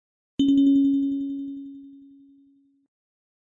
magical warning
i used this sound for a warning af a maigc box when it was empty
alert, magical, warning